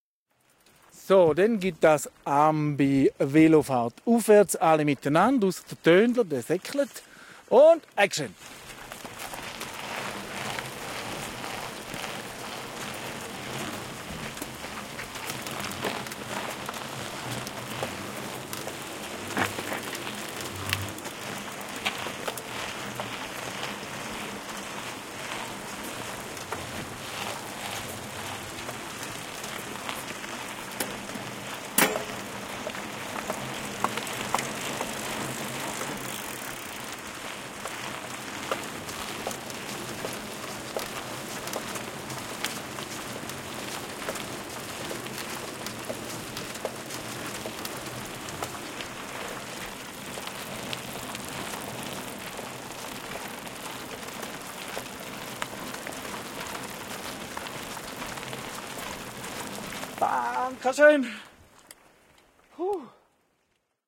4 Bikes downwards
Original MS-recording of 4 bikes downhilling a road in the mountains.Converted to stereo
wheel, downhill, fieldrecording, bike